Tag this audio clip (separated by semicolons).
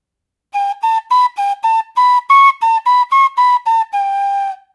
flute; call; delta; kalesma; iek